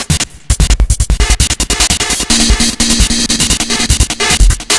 Ancient Kid 3
glitch, idm, break, drums